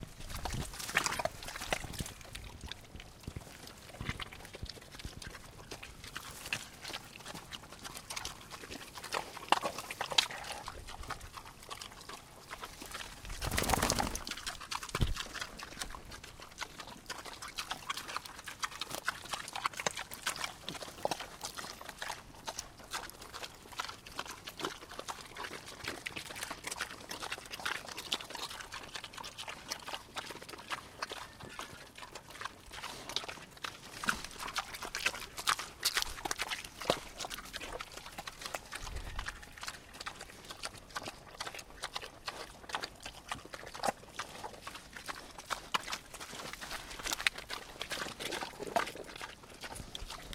Pigs in mud eating
chewing, field-recording, munching, pigs